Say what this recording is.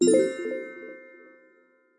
Synth glockenspiel ui interface click button positive 1
click, Synth